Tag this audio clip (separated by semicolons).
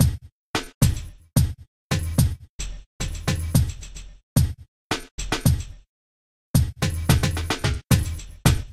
breaks; drum-and-bass